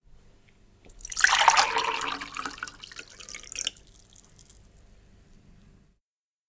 pouring water (short) 03
Bottle, Glass, Liquid, Water